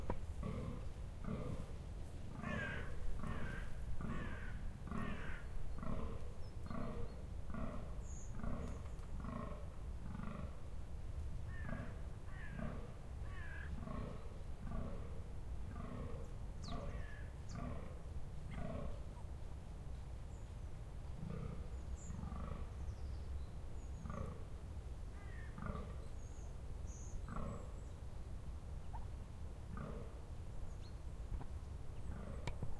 Roaring male Deer in Mating season
Recording made in "de Amsterdamse Waterleiding Duinen" near Zandvoort in august 2011.
The deer are roaring to find a mate. The funny thing with this recording is that there is a crow cawing simultaneously with the deer 4 times in a row. Coincidental? :P
ambience, ambient, birds, bulderen, bulderende, conifers, crow, deer, duin, duinen, dune, hert, herten, kraai, male, mating, naaldbomen, roaring, vogels, wind